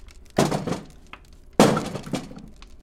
metal thud
metal, thud